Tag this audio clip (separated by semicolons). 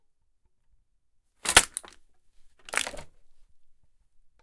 hit; throw; garbage; opening; break; bin; bottle; coke; steel; cans; smash; metal; container; noise; rubbish; crash; industrial; tin; toss; can; water; pail; field-recording; trash; empty